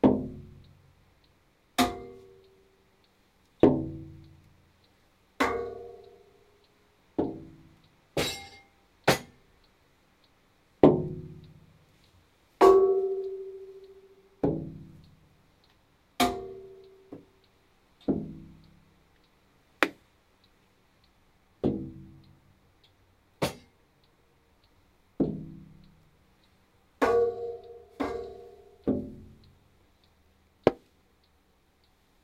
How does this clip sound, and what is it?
Rhythm-Pattern_ Playing with different KitchenTools in a small kitchen_recorded with DAT-Tascam and TLM170
objects, sequenz, 100bpm, percussive, kitchen